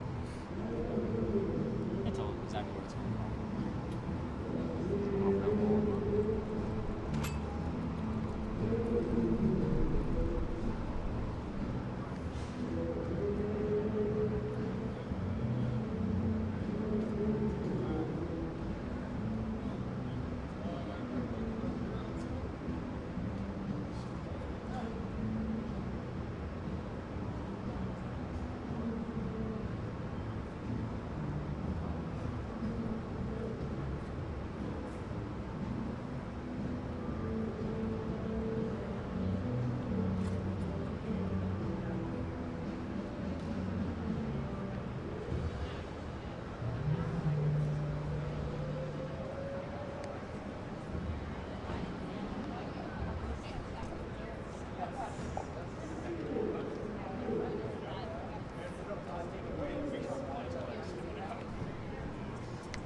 ambi House of Blues on wall
This is the sound of a concert at the New Orleans House of Blues as it bounced off the wall of the building behind it.
music, New, Orleans